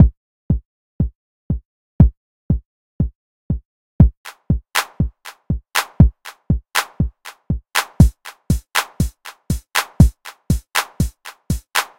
Simple drumloop. I'm often looking for simple 909 drum loops.
Clip starts with 8 drumbeats, then 8 claps added and at last 8 closed high hats added.
Only adjustments are velocity changes.